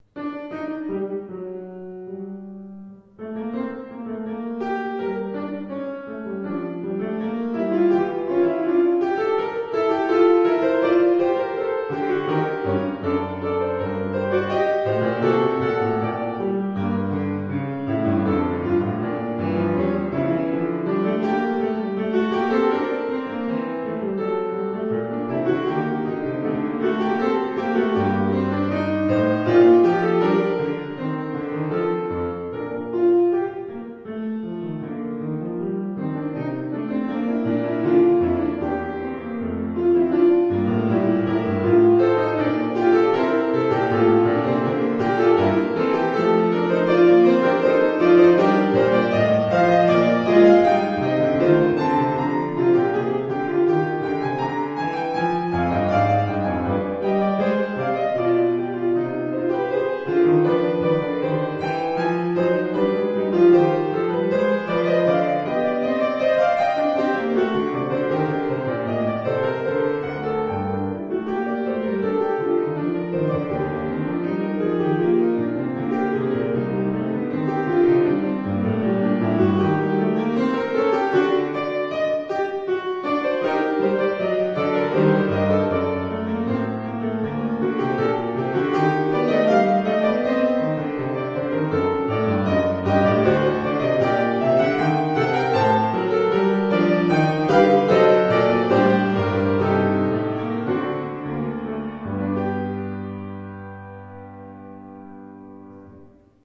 Bach fugue in Gmin
This is my execution of Johann Sebastian Bach's Fugue in G minor from "The Well-Tempered Klavier, Book I", with a grand piano. Recorded with Zoom H2.
classical, recording, music, piano, baroque, bach, grand-piano, fugue